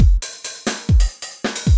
135beat-lS
It was created and exported with the Native Instruments Maschine and its Samples.
bpm, Maschine, beat, drumloop, 135, loop